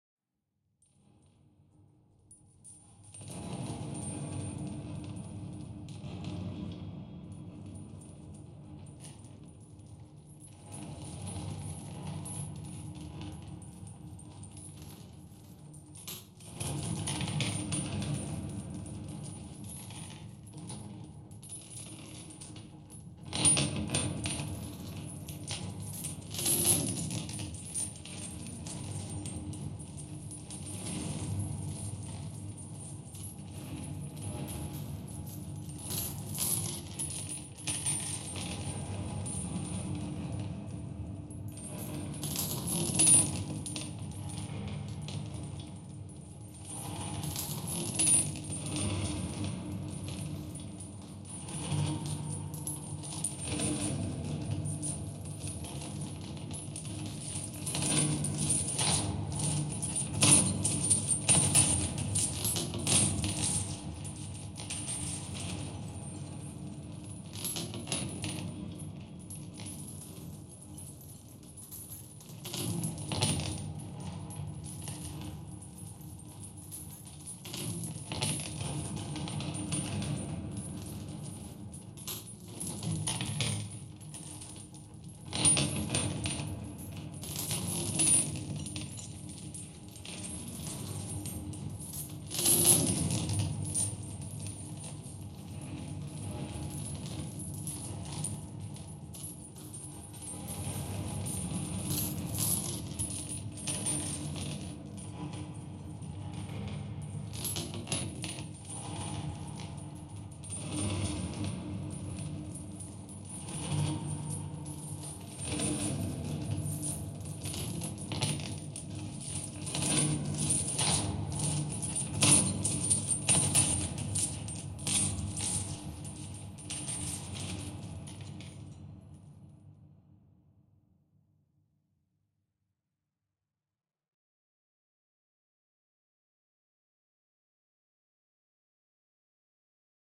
Pitch, time stretch an Doppler processes plus re-verb, for the final job. Created for a live music based performance ("Els amants de Lilith" -Lídia Pujol 2007)
terror, chain